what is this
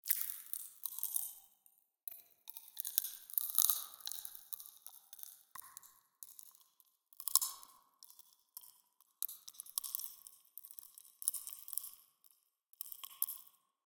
Cracking and Popping Sound
Well, you all know the good old popping candy... Basicaly after I ate some of it, I found out the sound to be quite satisfying and I've decided to record the sound next time I eat more of it.
The sound has been edited in WaveLab, I've made some cuts and added effects such as gate, roomworks and EQ...
Have fun!
Cracking, Popping, Crackle, Phut, Pop, Crack